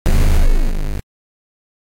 Exploding Saucer
game, space, Alien